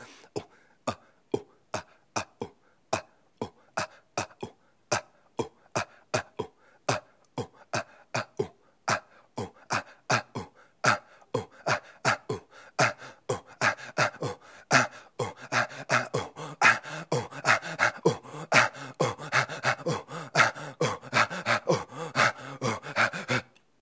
A very human/tribal breathing beat. Good for loops or whatnot - all done with my vocals, no processing.
bass, beat, beatbox, beatboxing, breathing, chanting, drum, human, loop, looping, loops, SFX, snare, tribal, tribalchant